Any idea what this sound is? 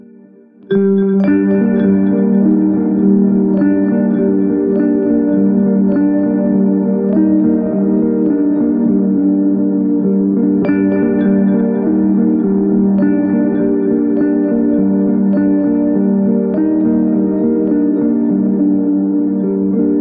RH BellGtrArp(102bpm)
Bell/Guitar arp loop
arpeggiation, bell, electric, guitar, simple, soft